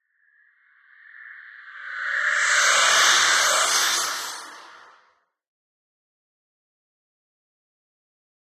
Ghost Fx
A processed 909 ride cymbal... I went on a mad processing excursion for about 10 mins, threw in lots of crap and ended up with this.
ghost, eerie